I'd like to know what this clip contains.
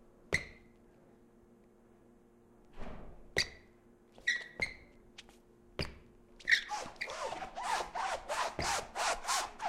Squeaky Shoes
shoes squeaking on a kitchen floor.
floor, squeaky, kitchen